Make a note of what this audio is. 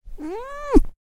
Male tabby; quick moan cut short, indoors, clear, clean